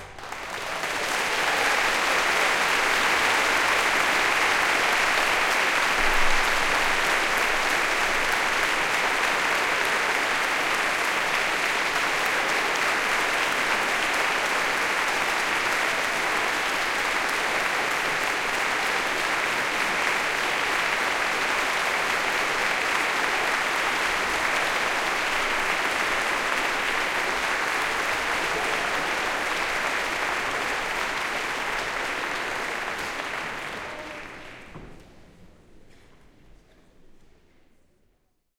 LONG APPLAUSE 001
A huge round of applause which went on for a full 35 seconds. Captured with various microphones around the sanctuary of 3rd Avenue United Church in Saskatoon, Saskatchewan, Canada on the 27th of November 2009 by Dr. David Puls. All sources were recorded to an Alesis HD24 hard disk recorder and downloaded into Pro Tools. Final edit was performed in Cool Edit Pro.
claps, clapping, applause, clap, audience, reaction, praise, concert